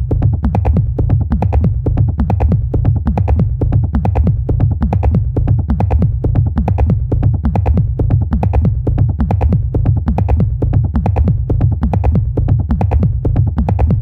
techno loop, nice to filter and use for mid section, or lowpass it and use for subbass....
loop, techno